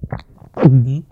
Cartoon - Gulp!
gulp, sound, effect, swallow, sfx, cartoon